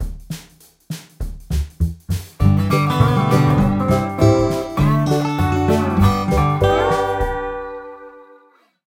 6 bar intro, G major, country feel, slide guitar, drum, upright bass, recorded using garage band on iPad air, using virtual instruments, slide guitar played live.